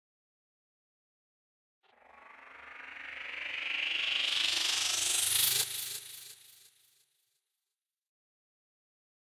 Noise Swell 1
noise, effect, fx